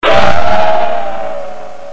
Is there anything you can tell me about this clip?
A neat teleportation sound effect that I somehow engineered out of a recording of a Las Vegas slot machine.